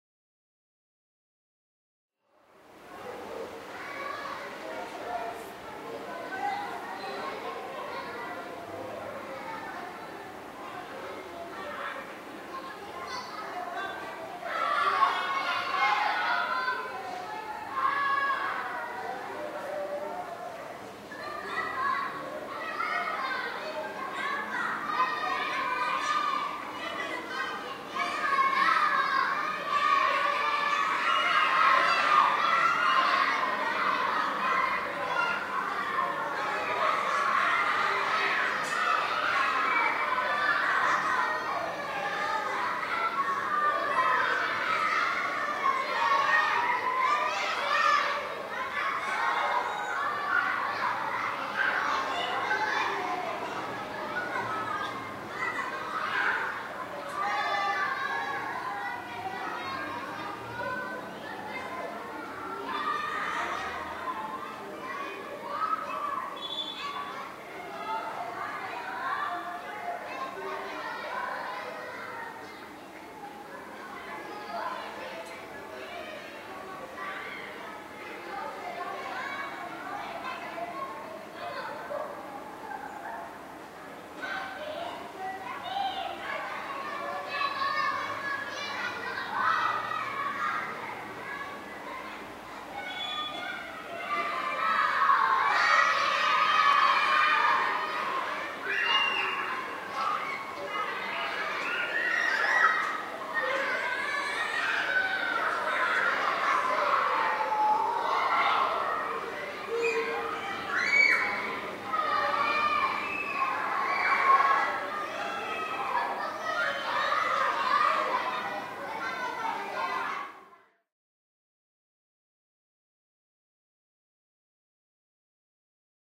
school children playing
Children in the distance playing in city schoolyard in the early morning with general light background traffic and building ambiance. Recorded in downtown Oaxaca, Mexico 2014.
child, children, city, games, kid, kids, kindergarten, morning, play, playground, playing, school-yard, scream, screaming, shouting, yell, yelling